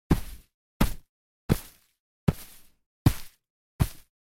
Six footsteps on grass with hiking boots. Each footstep is split by ~250 ms of silence. I layered a thud sound and me moving grass to make it.
Recorded with a H4n 06/06/2020.
Edited in audacity.